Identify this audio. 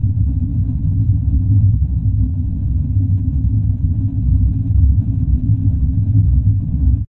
coming of terror
terror, horror-effects, drama, ghost, suspense, terrifying, thrill, horror, horror-fx